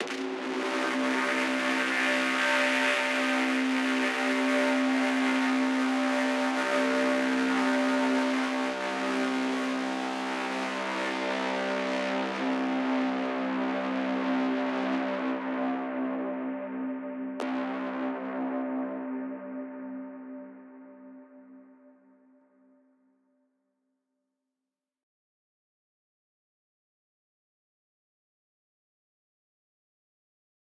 Distorted Elemnts 03
various recordings and soundfiles -> distorted -> ableton corpus -> amp
amp, corpus, distorted